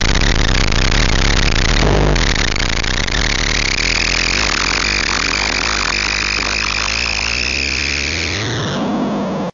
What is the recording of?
circuit bending fm radio
bending, circuit, fm